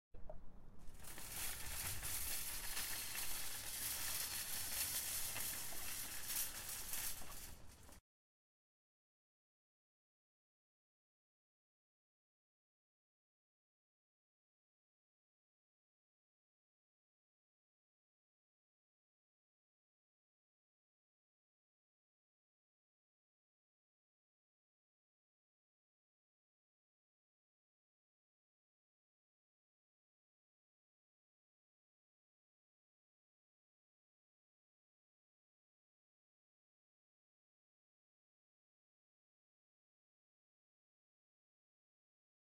superMarket car
the sound of the steel cars that the super markets have
food, market, mercado